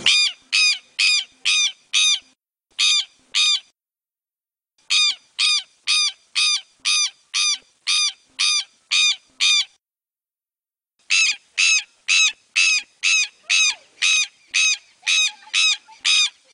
quero-quero
South american, south brazilian bird.
South, bird, brazilian